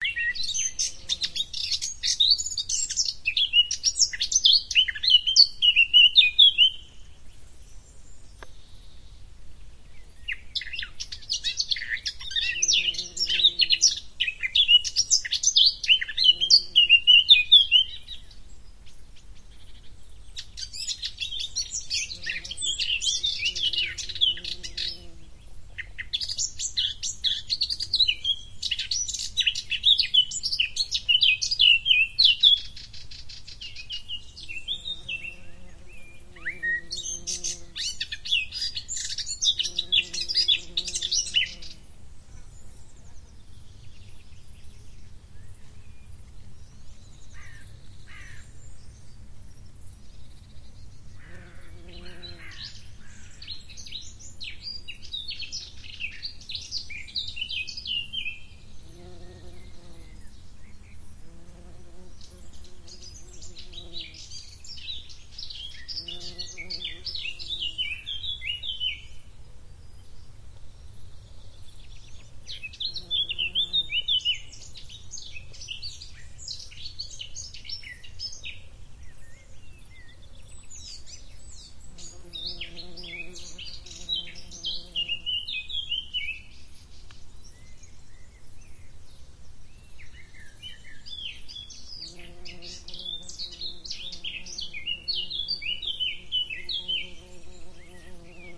Another recording of birdsong in Tuscany..not sure what bird it actually is ( maybe someone can identify?) but all these recordings were in a vineyard near Radda. Edirol R4/ Rode NT4.